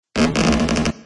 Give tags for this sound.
Home-made; Scifi

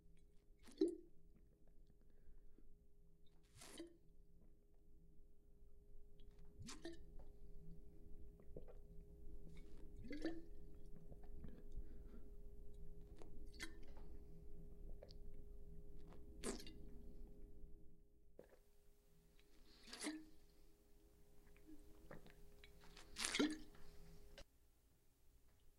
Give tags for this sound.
beer; bottle; drink; liquid; moving

